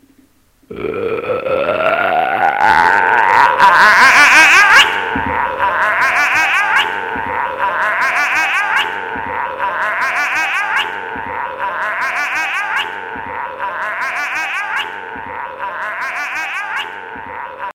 Grudge Movie Croak Sound Interpretation
The sound was created using my own voice drawing in air while croaking.
It was recorded using Mixcraft 6 and a Samson Q1U microphone. The only effects used were a delay set to long repeat, a touch of reverb while mixing and some compression while recording. But the sound is my voice, in fact all the sounds I upload here are self made. :o)